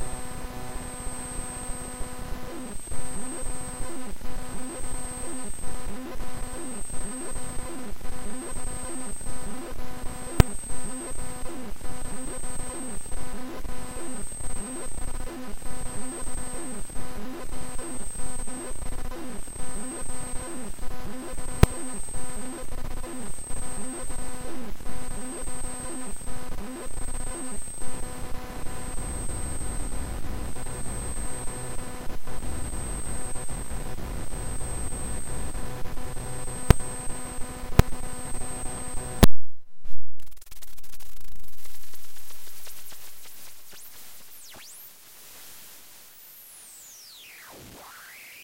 So I finished a skype convo with a buddy of mine and when we hung up I heard this weird noise... So I explored it, This recording is basically the odd noises my computer makes when My mic is plugged in, Only editing I did was a 1 DB amplification. The rest is as is, The begining "zoom" noises are my maximizing and minimizing windows, then I scroll a bit then I unplug the mic and it hisses and sounds like a radio for a bit... enjoy... I know I did.